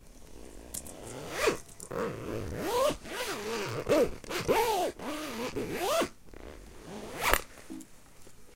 The zipper of an Herve Chapelier tote bag.